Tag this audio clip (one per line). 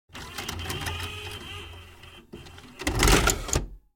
cassette
eject
recorder
tape
video
videocassette
videorecorder